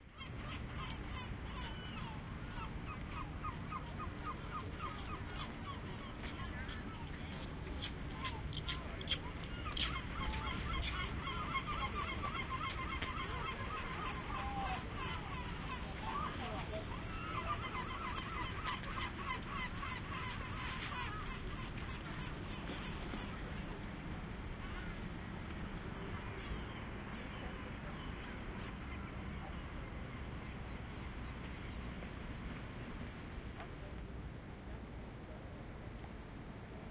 Gibraltar Mountain (apes, birds)
Gibraltar Mountain, apes, birds. No background music. Recorded with artificial head microphones using a SLR camera.
nature, apes, field-recording